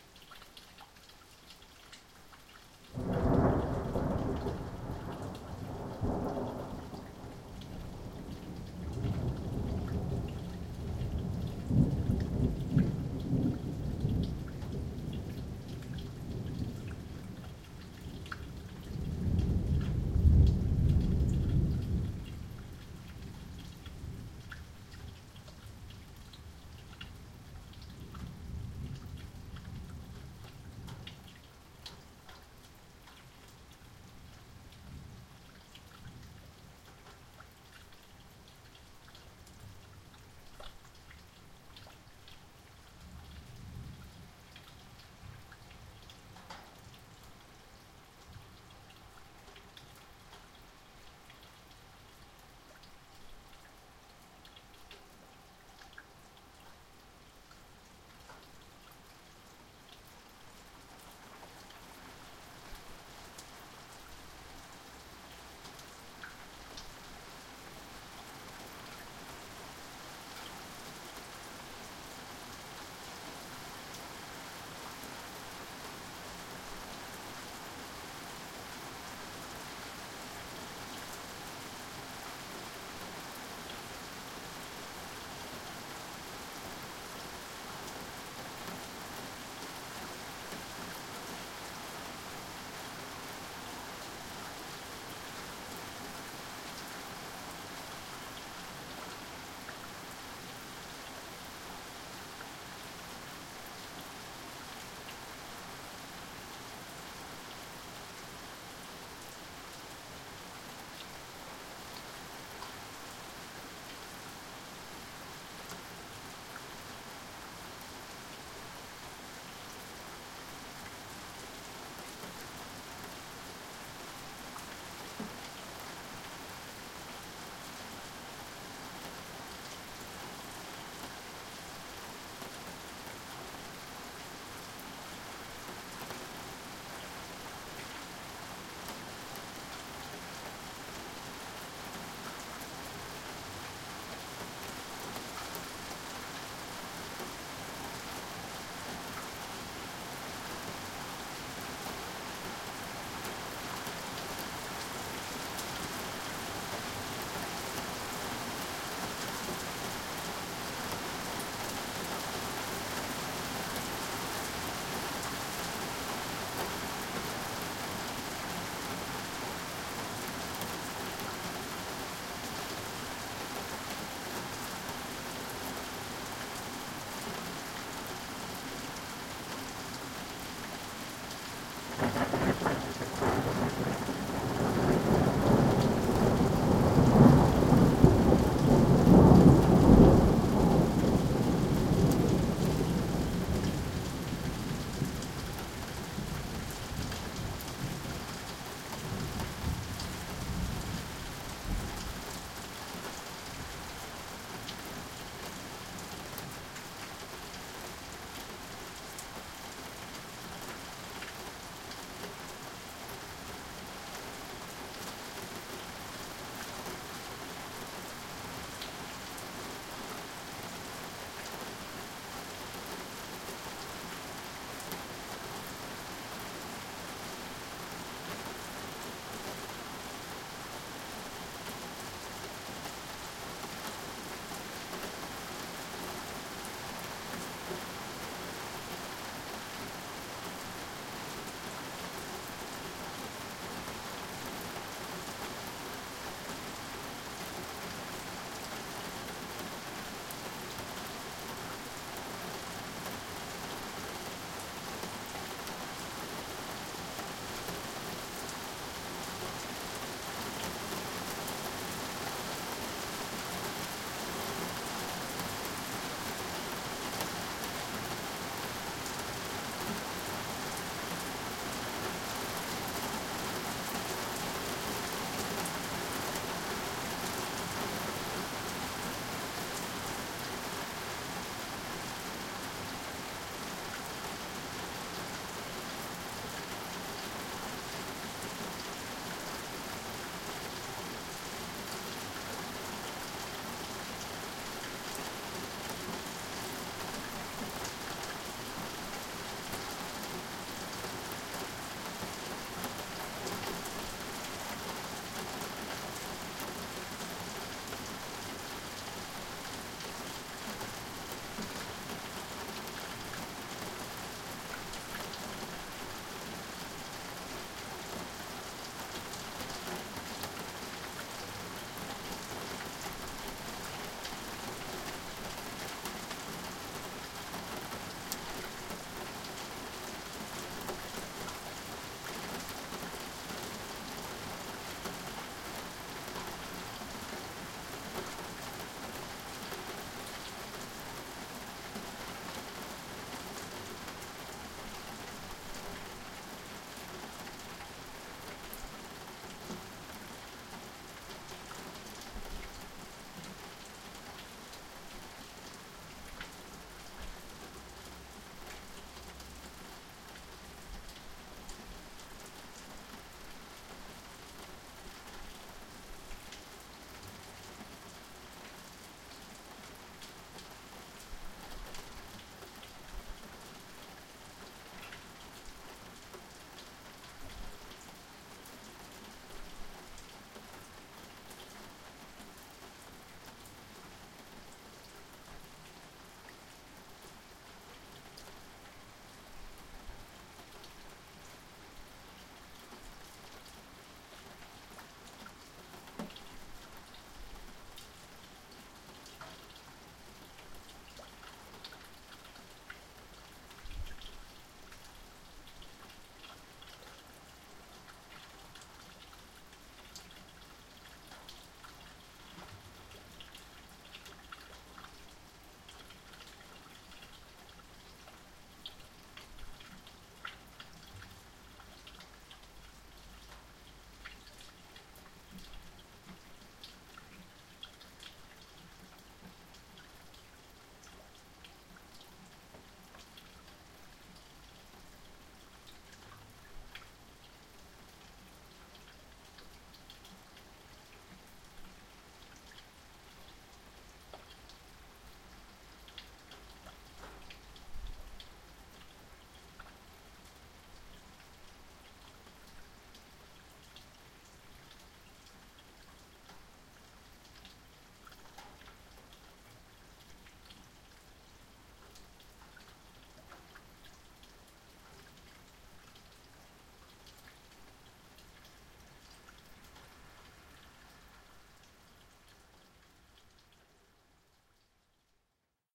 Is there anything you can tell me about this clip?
Running water, thunder, rain building up and dying down. Recorded with Zoom H2